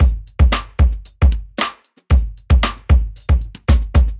Made with Battery 3 plus some fragments of older beats.